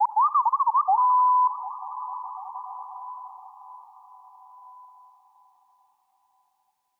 scratching-beep
FX created with simple sine tone at 1 KHZ and some rewerb. I did the simple scratch in audacity and reorganized the parts of it in cool edit 2.1.